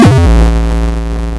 A synth drum sound isolated from a clip where I have discarded all other sounds (repeats of similar sounds already on this pack).
As it is this sound is a bit long to be used as a synth drum - but that is intentional, so that you can shape it with envelopes, and filters, cut slightly different sections of it or use your sampler's filter and envelops to create many variations of this sound.
Created with a feedback loop in Ableton Live.
The pack description contains the explanation of how the sounds where created.